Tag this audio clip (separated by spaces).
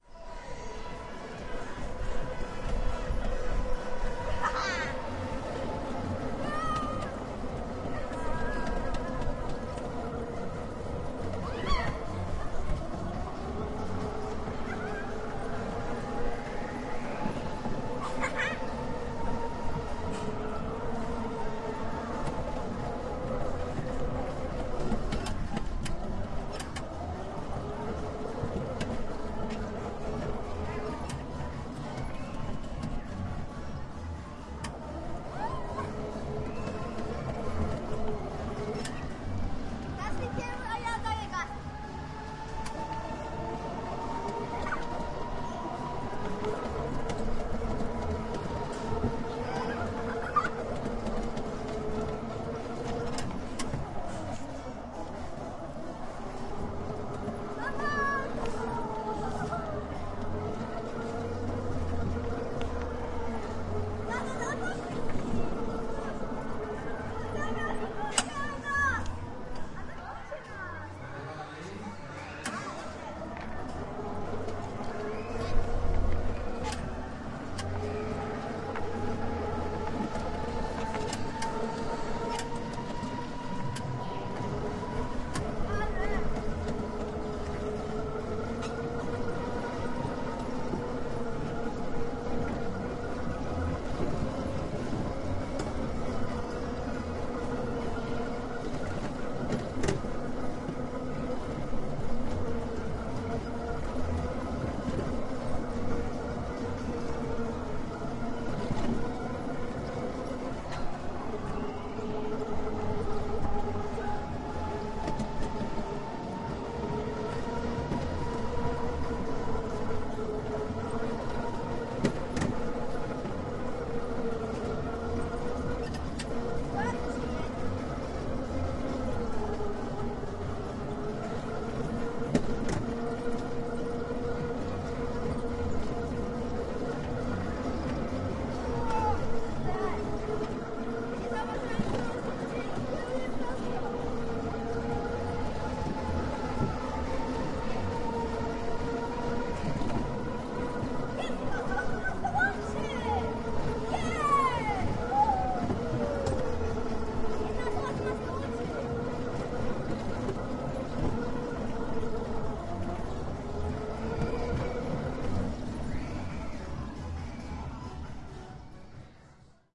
amusement autodrome park